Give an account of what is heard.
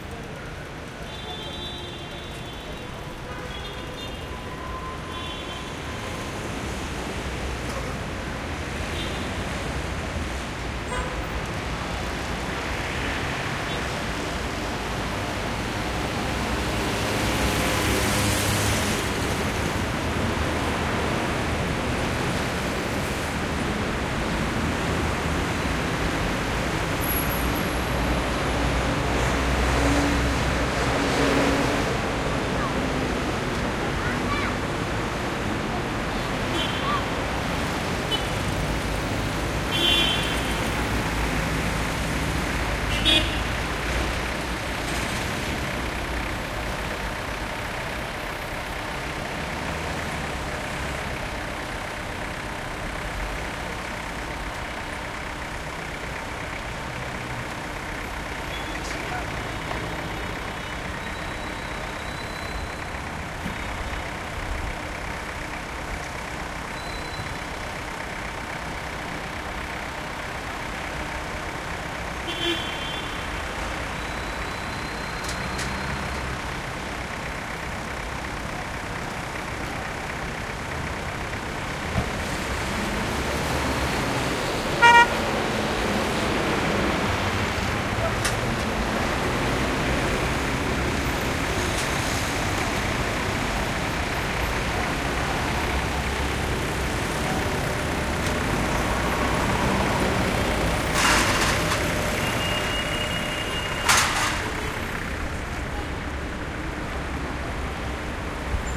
traffic heavy dense close city big street center throaty mopeds horns Casablanca, Morocco MS
mopeds, city, center, close, heavy, throaty, horns, street, big, Casablanca, Morocco, dense, traffic